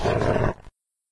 A dog growl from a labrador retriever
Retriever, Labrador, Labrador-Retriever, Big, Animal, Dog, Growl